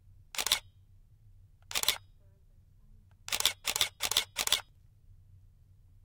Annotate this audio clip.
Recording of a DSLR Camera taking several photographs. The camera used was a Canon EOS Rebel T5 DSLR and was recorded in a lab learning audio booth using Blue Microphones Yeti USB mic. Only edits made was trimming away beginning and ending silence.
camera, canon, click, digital-camera, DSLR, photo, photograph, photography, shutter, shutter-speed, T5